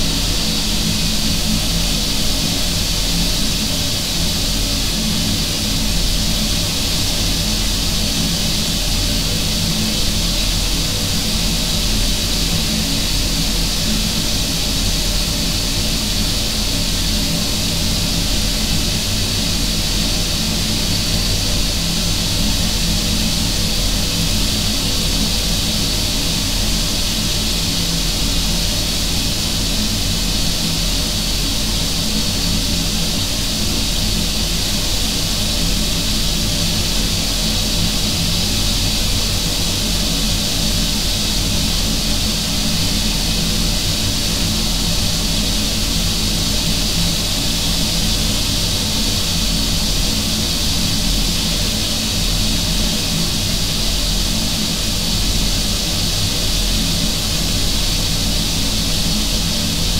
Airco system air intake thingy device. You know, one of those sucking things in bathrooms and such.